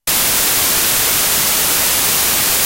raw wave recorded directly into emu 1820m. Different Pre Filter Mixer Gain (and therefore different overdrive), Filter bypassed